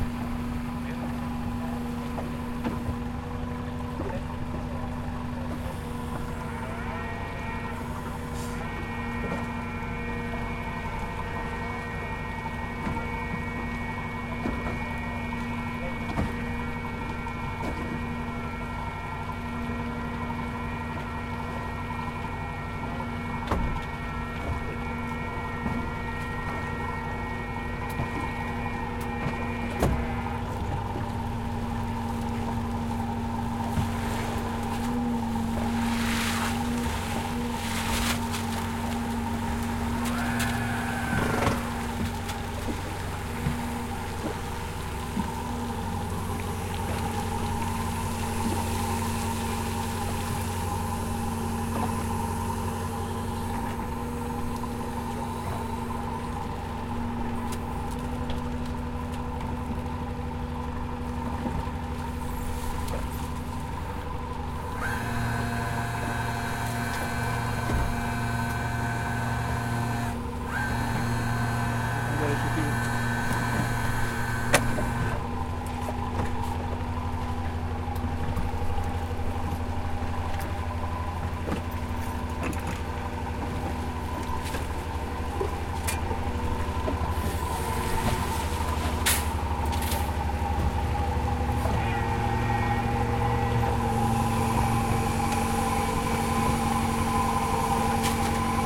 Marine cranes, from a punt, moving at sea (sound recorded from a boat with the engine running).